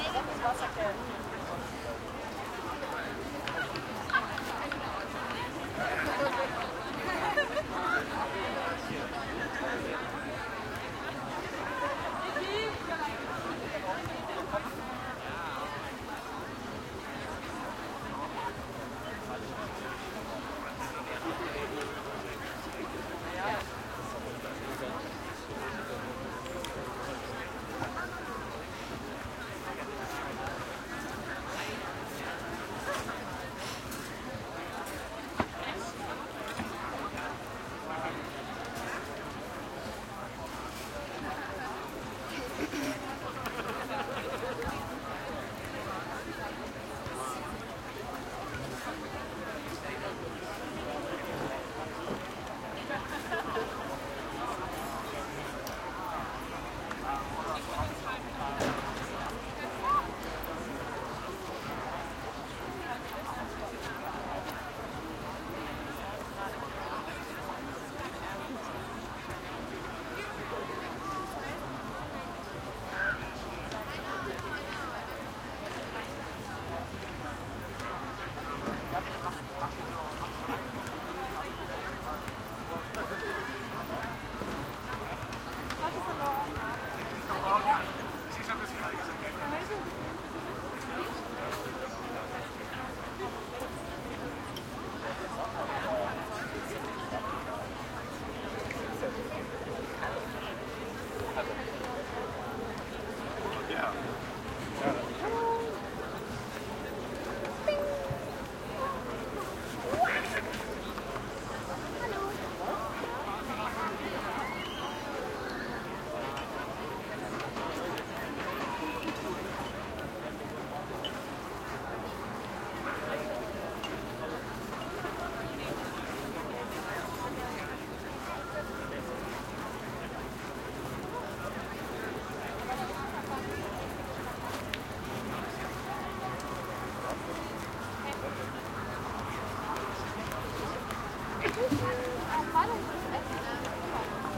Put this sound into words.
outdoor community festival crowd ext medium meal time english and german voices and movement everywhere2 kitchen right
outdoor, festival, medium, community, crowd, ext